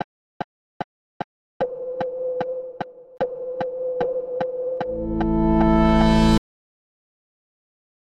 A fun "whooshy" intro from a project never finished. Clocked around 150 BPM. Old project, not sure what that chord is and the end of the intro :)